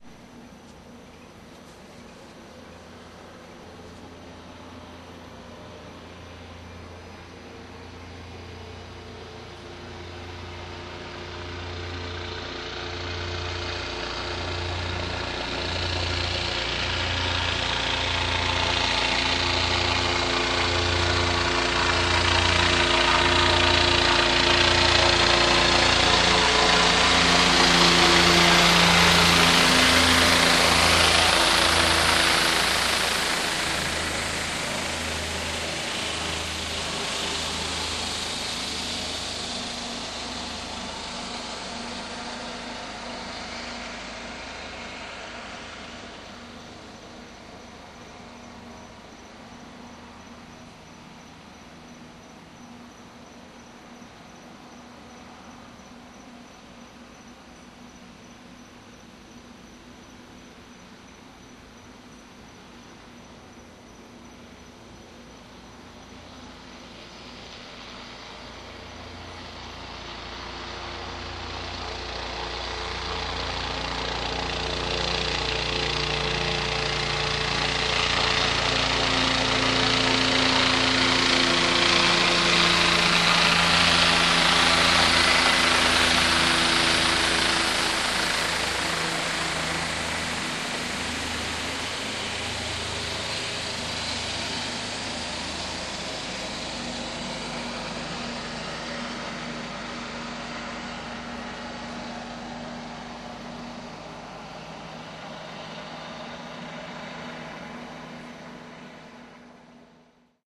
police chopper3
Police helicopter and a dozen cop cars, including a K-9 unit searching the hood, recorded with DS-40 and edited in Wavosaur. Circling overheard, searchlight blaring, cop car lights flashing.
chopper, field-recording, helicopter, manhunt, police, search